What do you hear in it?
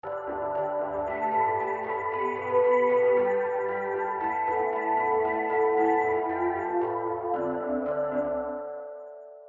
Nord Low2 Dirty
Nord Lead 2 as requested. Basslines are Dirty and Clean and So are the Low Tone rhythms.
acid, ambient, backdrop, background, bassline, electro, glitch, idm, melody, nord, rythm, soundscape, synthesizer